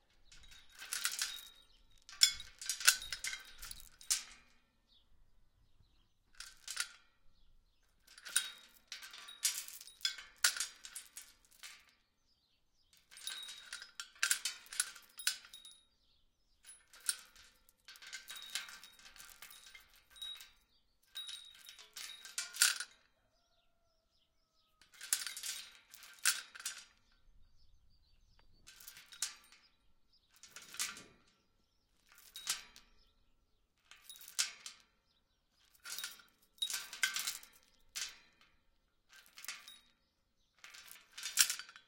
small chain wrap around metal gate bars like locking it various2
small chain wrap around metal gate bars like locking it various
bars, chain, gate, lock, metal, small, wrap